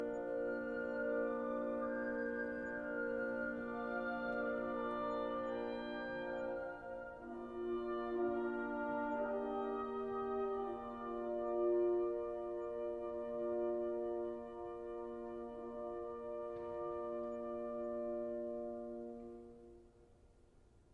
II. Taken with a black Sony IC voice recorder, at Boettcher Concert Hall with the Denver Young Artists Orchestra. Could be for a cinematic resolution or success musical moment. Enjoy and God bless!